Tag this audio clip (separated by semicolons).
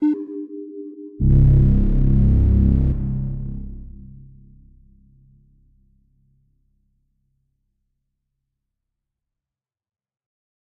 electronic; horn; invasion; pacific-rim; reaper; sci-fi; tripod